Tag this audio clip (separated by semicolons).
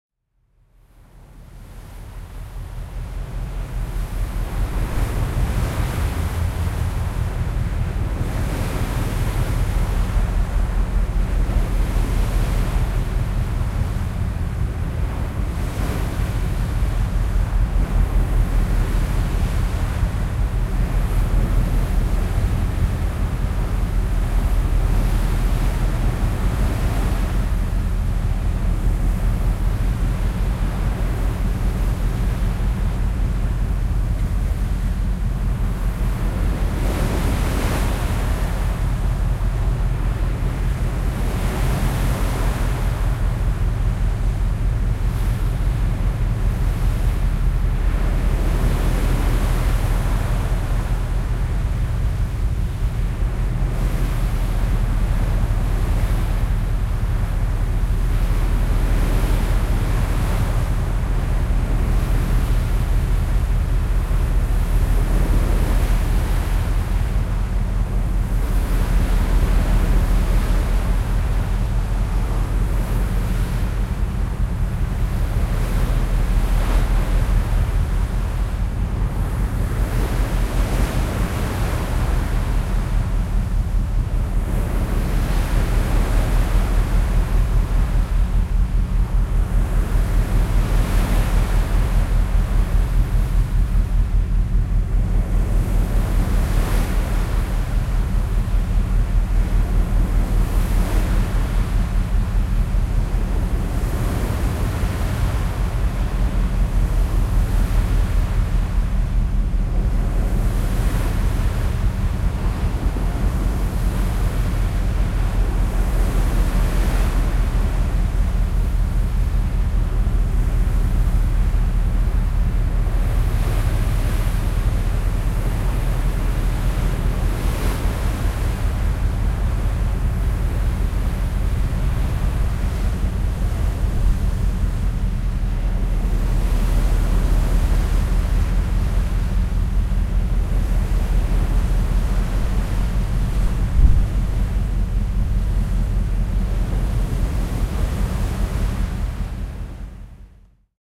drone; field-recording; waves; wind; ocean